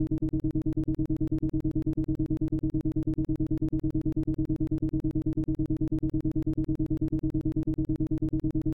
Video game medium text blip.